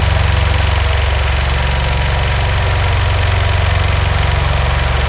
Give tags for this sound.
diesel engine generator loop

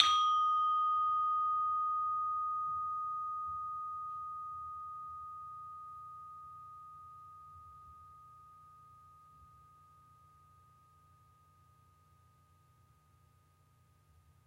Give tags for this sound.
Casa-da-m Digit Digitopia Gamel Gamelan Java o pia porto sica

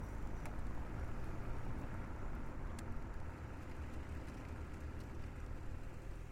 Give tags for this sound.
auto highbit tire car driving sound

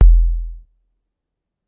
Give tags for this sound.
analog electronic synthesizer synth-library noise synth modular weird

